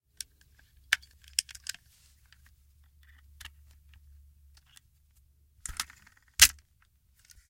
Loading a revolver and spinning it shut.
foley, gun, loading, revolver, spin